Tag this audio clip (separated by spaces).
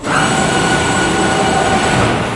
motor medium Rev